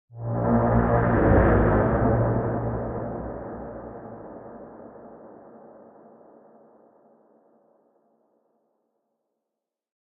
A strange fx 02
A strange fx, almost like a robot or a machine.
Echo, Robot, Strange, Fx, Machine, Effect